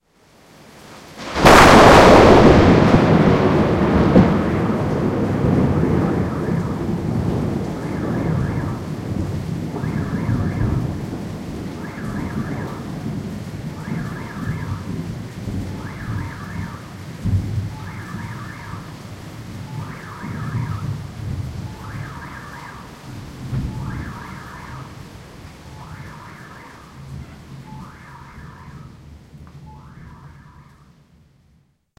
Powerful thunderclap at 12:40am, September 30, 2010. Raw.